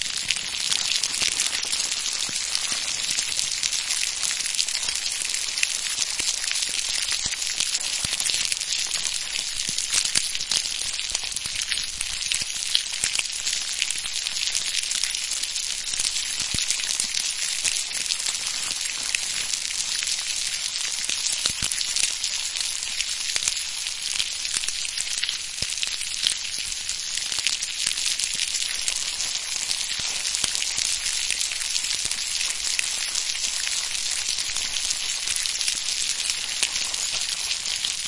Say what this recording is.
the foley fire burning/food frying sound effect
effect, kitchen, fire, crack, sizzle, sound, food, fry, free, burn, frying, foley, burning